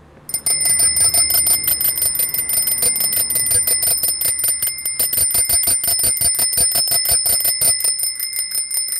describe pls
Sonicsnaps-OM-FR-sonnette-vélo
a bike bell rings.
field-recording, Paris, snaps, sonic, TCR, water